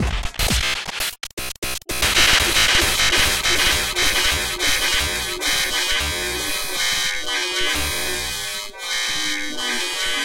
BZH Breakup3
Denigrating Break pattern created from sampled and processed extended trumpet techniques. Blowing, valve noise, tapping etc. materials from a larger work called "Break Zero Hue"